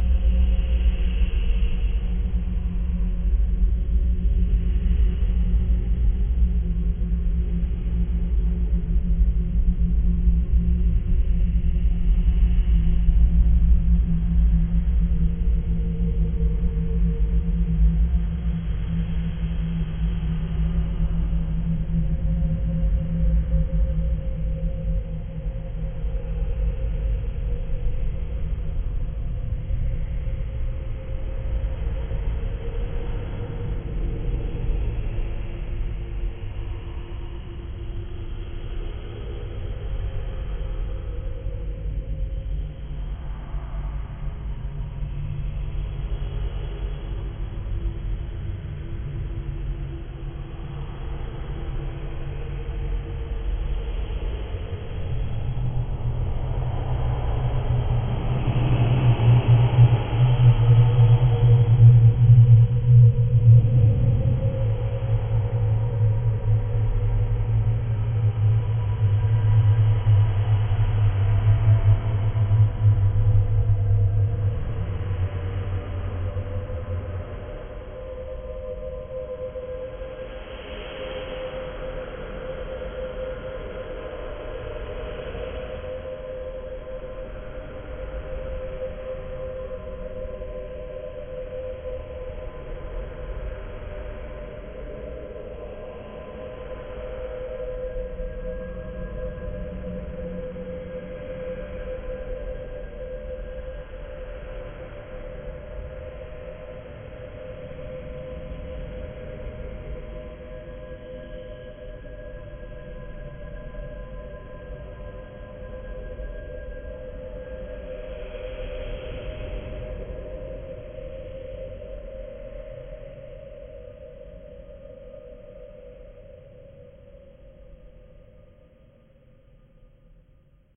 Scary Factory 02
demon, horror, factory, survival-horor, game, creepy, haunted, slender, engine, creak, scary-sounds